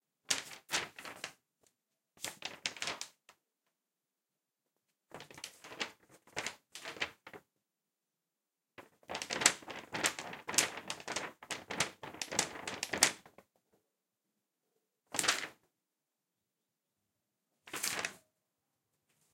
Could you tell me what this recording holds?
Handling Paper and flapping it
Handling a paper, flapping it, then doing a handing-the-paper-to-someone motion
wave, paper